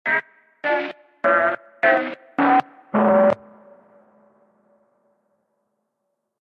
Just a thing of slowed down samples on my computey.